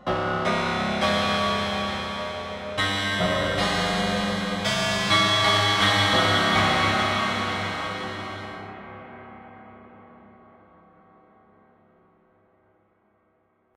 Prepared Piano 3
Created in u-he's software synthesizer Zebra, recorded live to disk in Logic, processed in BIAS Peak.
prepared-piano, synthesized, metallic, abstract, processed